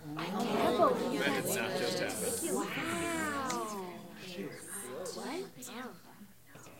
Small audience making disapproving and surprised noises